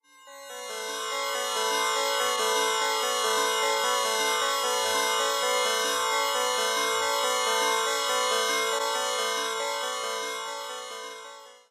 Create032Fadeinout
This Sound Was Created Using An FM7 Program Keyboard. Any Info After The Number Indicates Altered Plugin Information. Hence A Sound Starts As "Create" With A Number Such As 102-Meaning It Is Sound 102. Various Plugins Such As EE, Pink, Extreme, Or Lower. Are Code Names Used To Signify The Plugin Used To Alter The Original Sound. More That One Code Name Means More Than One Plugin.
Scifi, Ambient, Mood, Dark